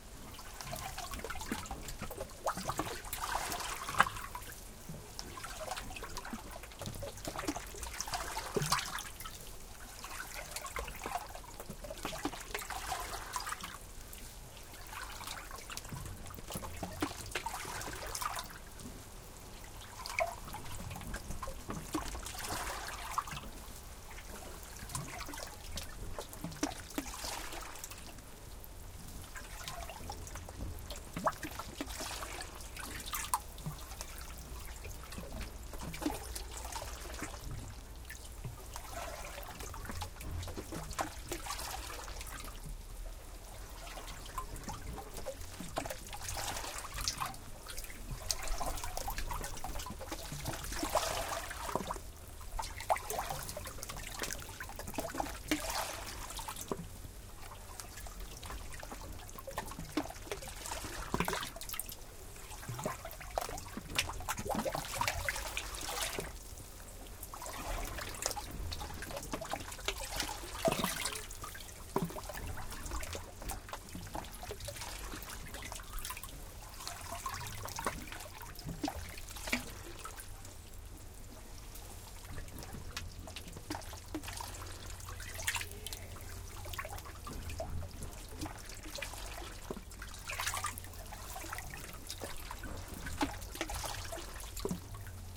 HoughtonFalls RocksWashRecede
Lake Superior- Water entering and leaving rock formation at shore.
Field-Recording, Lake, Rocks, Sea, Superior, Trickle, Water